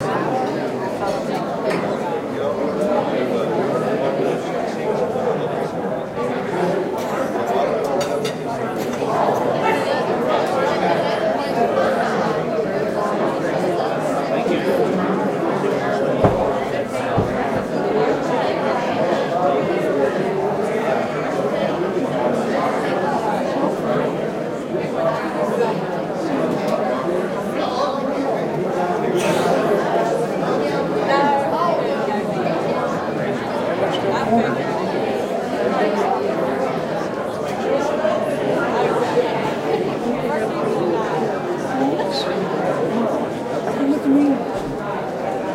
Crowd Noise 2
This was recorded at a wedding and celebration party afterward. Several hundred people talking in a very large room. It should be random enough to be used for most any situation where one needs ambient crowd noise. This was recorded directly from the on board mic of a full hd camera that uses Acvhd. What you are listening to was rendered off at 48hz and 16 bits.
crowd, party, sounds